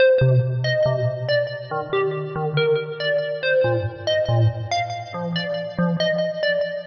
A softsynth riff i created using f.l. studio 6.
140-bpm, bass, progression, phase, hard, drumloop, melody, distorted, 150-bpm, flange, distortion, beat, trance, sequence, pad, techno, synth, drum, bassline, strings